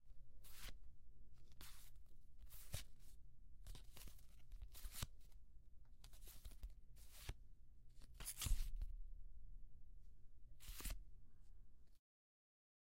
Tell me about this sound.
105-Taking a paper
Taking the Winning Ballot